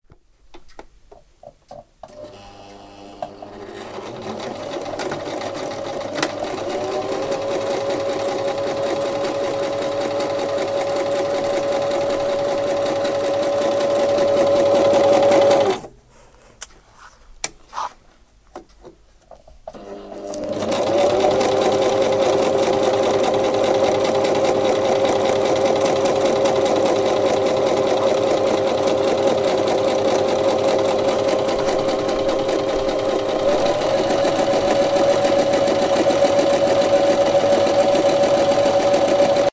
Sewing machine
Recorded and processed in Audacity
Hum, industrial, machine, machinery, mechanical, sewing, thread, whir